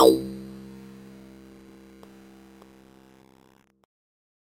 Acid one-shot created by remixing the sounds of